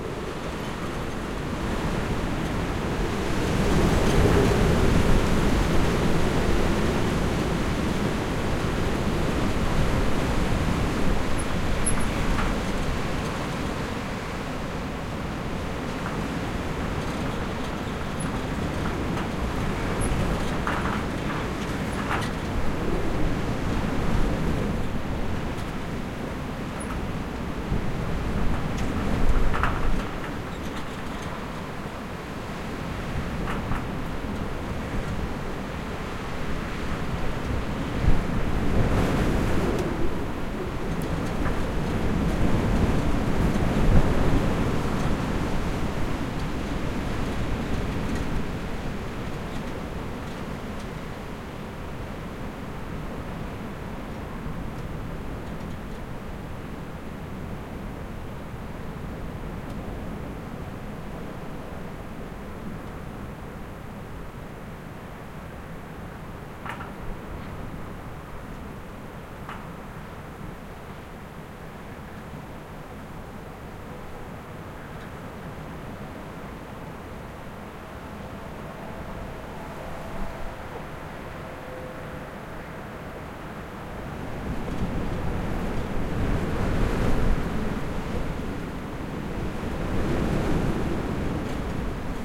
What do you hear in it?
Strong wind
This recording was recorded using a Zoom H6 with the MS (mid-side) capsule that come with it. It was recorded in Harstad in nothern Norway on a stormy day, early in the morning before the traffic starts.
The recording was made handheld but in a static position
blow, blowing, breeze, capsule, h6, harstad, howling, Mid-Side, MS, norge, norway, nothern, storm, stormy, strong, wind, windy, zoom